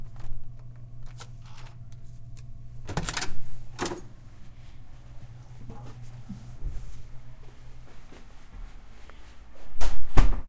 Its my turn to try and record the sound of opening a hotel door! Recorded at the Brushlake in Gainsvill, FL.
slam, humming, hotel, reader, swipe, card, hum, card-reader, door, open, close, motel